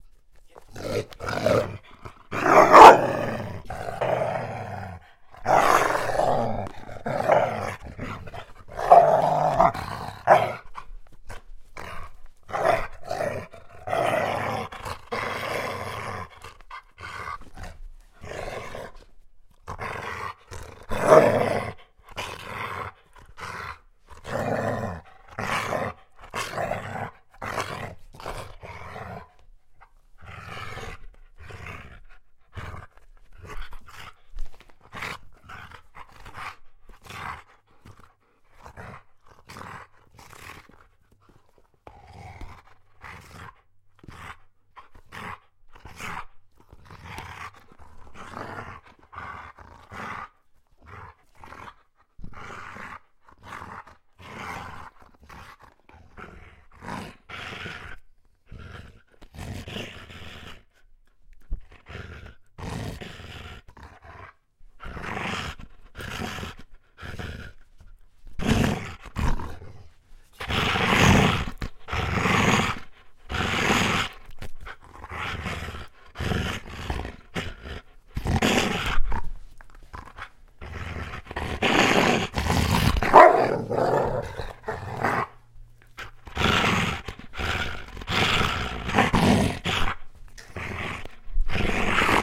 Medium Dog Growl
My dog Leela, A German Shepherd cross playing tug of war. My First upload.